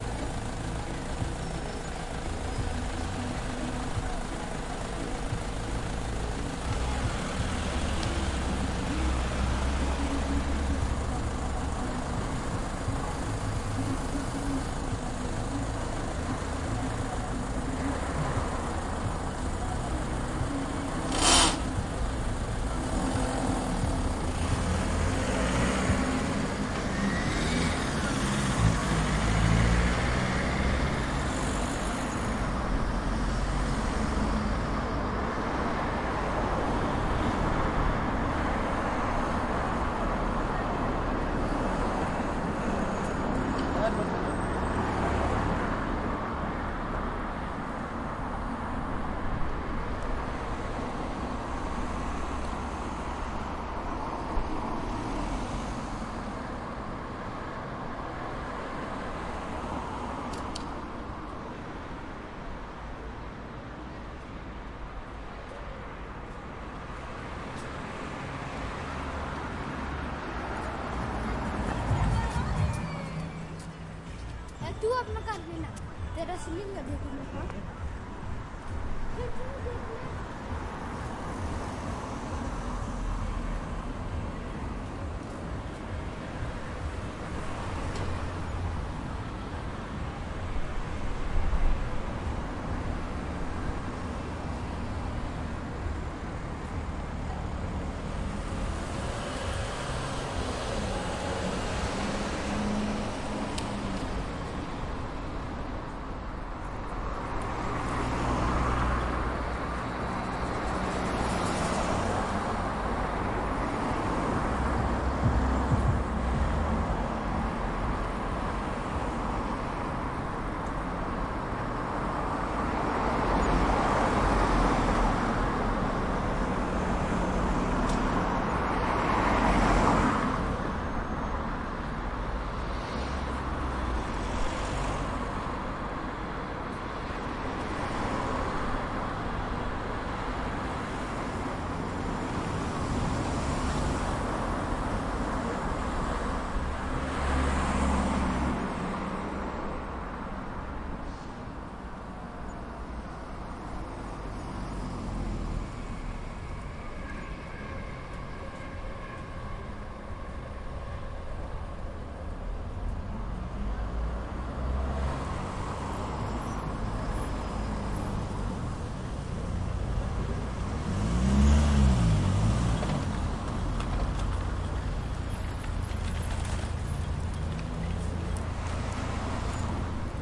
Busy road - Levenshulme, Manchester
The A6 in Levenshulme Manchester